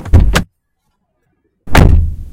Car Door opening and Closing
My first ever upload. This is the sound of my ca door opening and closing. Used a Zoom H4n Recorder to record the sound.
Opening, closing, Door, Car